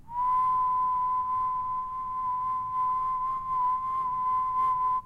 Whistle 2 Low
Low pitch whistle
pitch, whistle